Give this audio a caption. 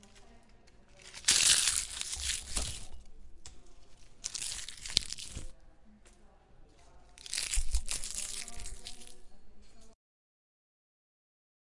4 dried leaves
Open, Library, OWI, Window, Sound